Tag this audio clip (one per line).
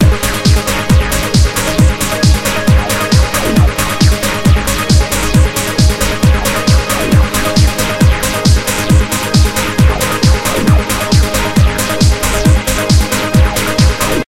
red-m
remix
tribute